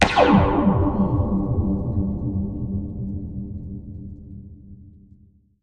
Create Beam 1 –50 sound from SiêuÁmThanh’s 'Beam 1' sound.
Audacity:
• Effect→Change Speed…
Frequency: percent change: –50
alien, laser, sci-fi, space, weapon